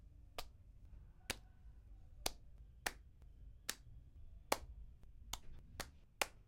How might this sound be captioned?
Sonido cuando una vasija cae en la mano